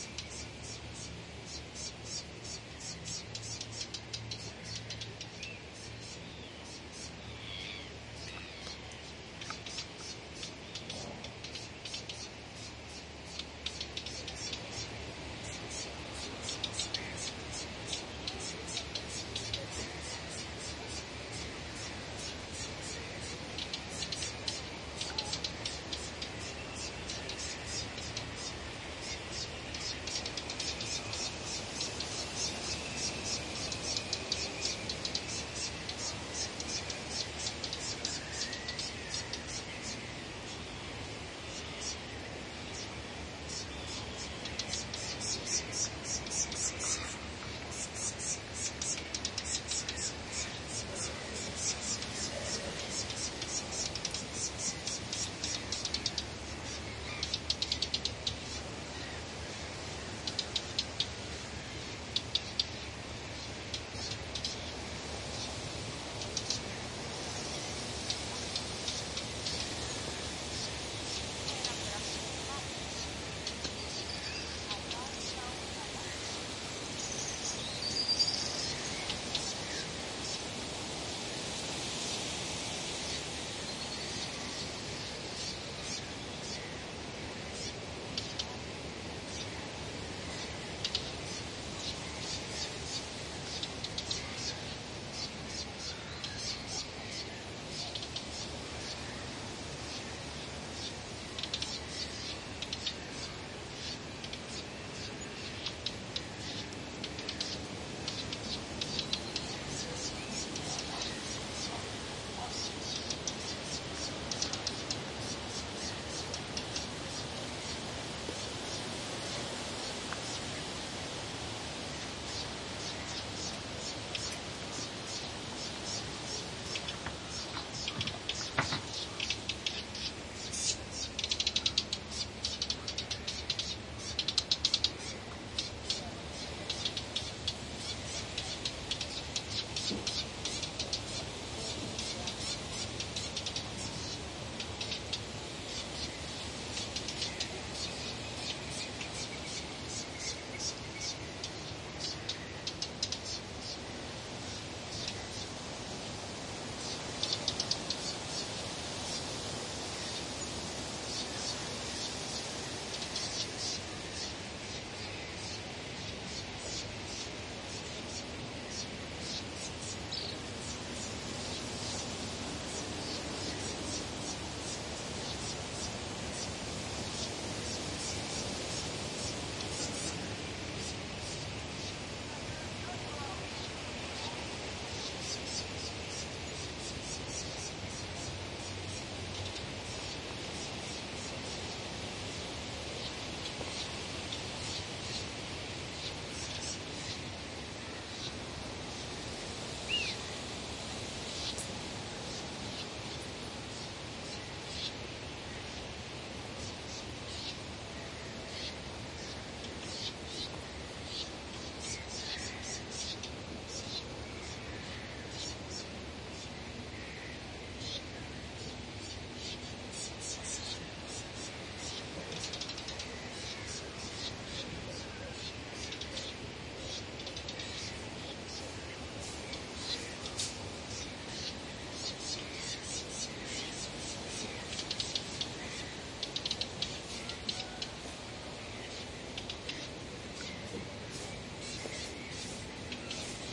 A field recording in Aucar Island, Chiloé. Birds chirping, windy trees and faraway boat engines. Some human dialogue.
Recorded on a MixPre6 with LOM Usi Pro microphones.